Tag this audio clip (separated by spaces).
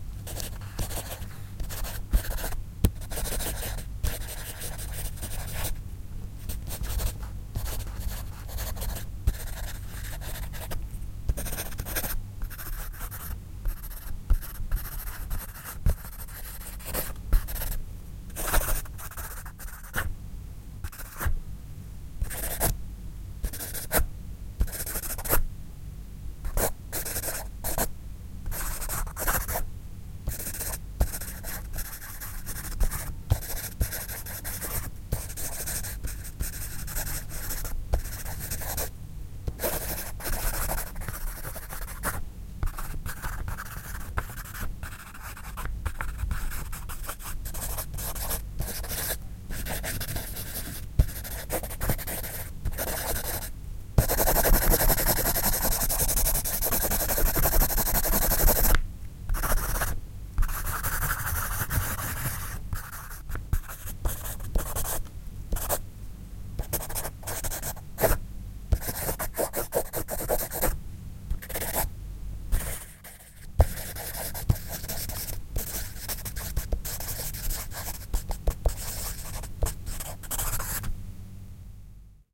close detail draw drawing effect fast marker notebook paper pen pencil scratch scribble slow sound student up words write writing